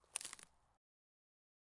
This sound is of someone stepping on twigs and snapping them.